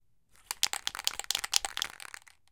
Shaking paint spray balloon 1
Shaking paint spray balloon. Knocking of the ball inside 400ml metal balloon with paint.
Recorder: Tascam DR-40
aerosol, ball, shaking, knock